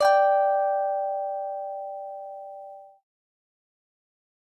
guitar tones 004 string G 19 tone D5+5
This is one note from my virtual instrument. The virtual instrument is made from a cheap Chinese stratocaster. Harmonizer effect with harmony +5 is added